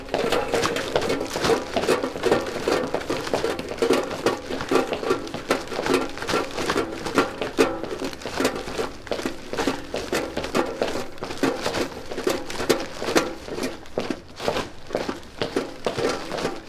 woman running with noisy stuff groceries paper bag cookie metallic case cling clang
noisy, stuff, bag, cling, paper, case, metallic, running, groceries, cookie, woman, clang